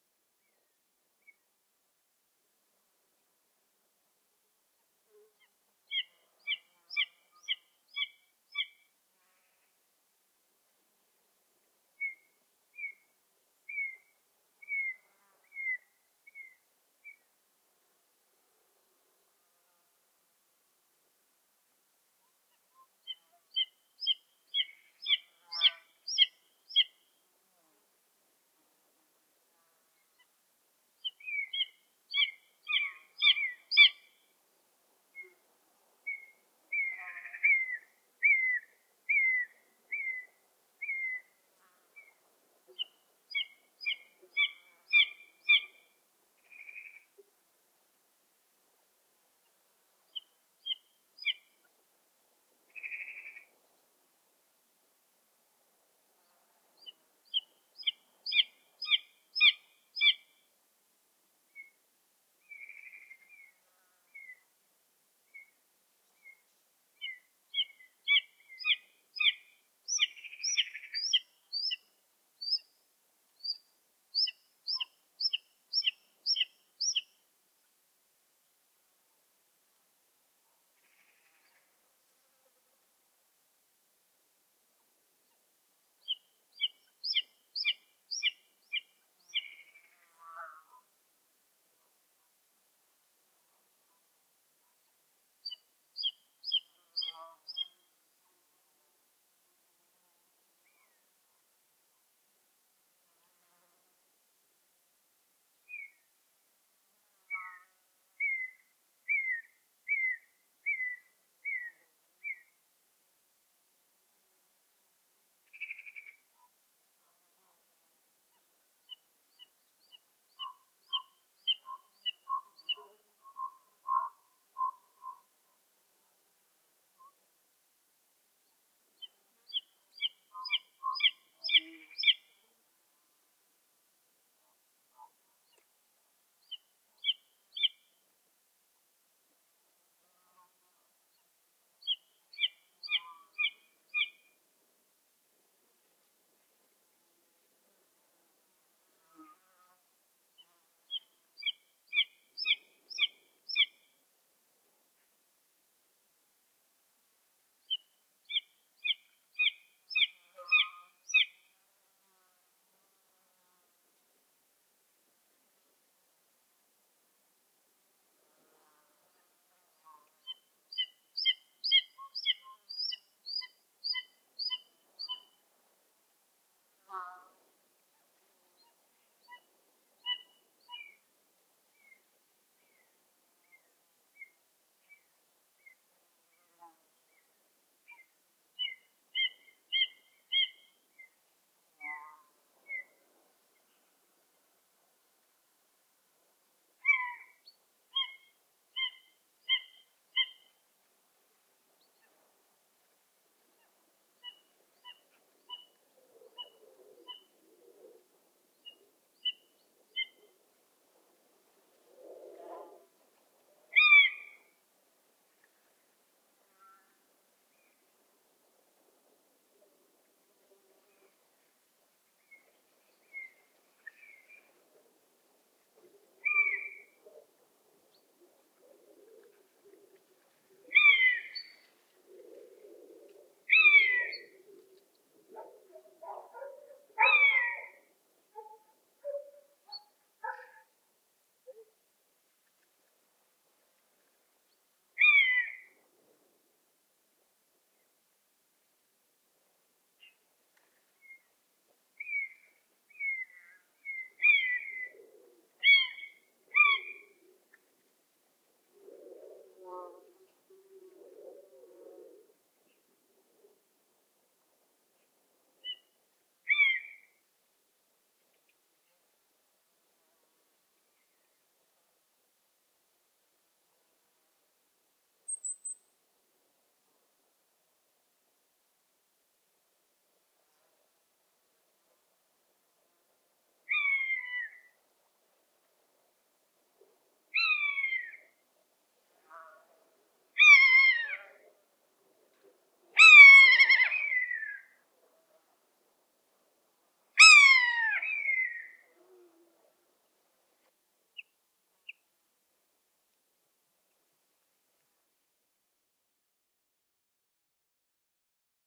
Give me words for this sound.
Two Buzzards
A mono field-recording of two Common Buzzards (Buteo buteo).One ( a juvenile I suspect)is fairly close and perched on a tree, the other is flying around and off axis most of the time. At 4:50 the bird leaves the perch and flies directly towards the mic while calling. Rode NTG-2 > FEL battery pre-amp > Zoom H2 line in.
bird, buzzard, birds, buteo-buteo, field-recording, raptor, common-buzzard, mono